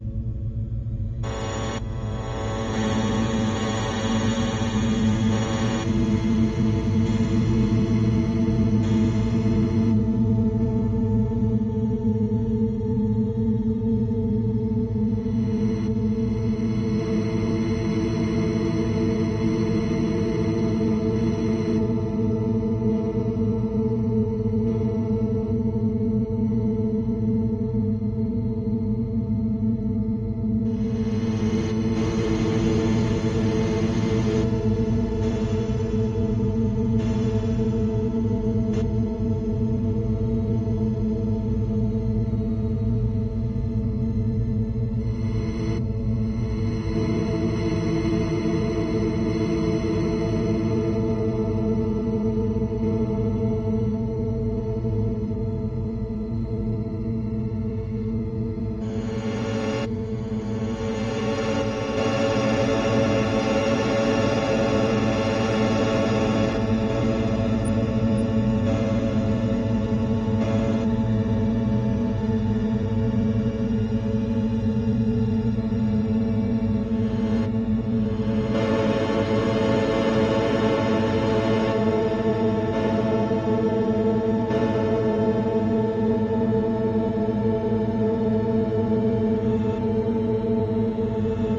ps Glitched sitar lounge
Paulstretch started to glitch when I did something to it, don’t remember what exactly it was. Sitar-like metallic sounds.
drone, metallic, organish, Paulstretch, glitchy